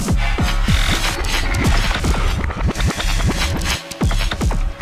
Travel to the depths of Parallel Worlds to bring you these 100 sounds never heard before...
They will hear sounds of the flight of strange birds if they can be called that, of strangely shaped beings that emitted sounds I do not know where, of echoes coming from, who knows one.
The ship that I take with me is the Sirius Quasimodo Works Station, the fuel to be able to move the ship and transport me is BioTek the Audacity travel recording log Enjoy it; =)
PS: I have to give up the pills they produce a weird effect on me jajajajaja